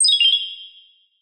Heal - Rpg
Created from an altered version of the "sine jingle" audio I uploaded,
this clip is meant to mimic the sound of casting a healing spell in an rpg.
This sound, like everything I upload here,